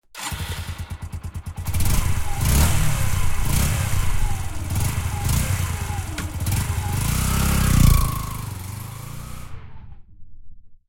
Yamaha YBR125 sound
Sound of motorcicle Yamaha YBR 125
Yamaha, motorcicle, YBR125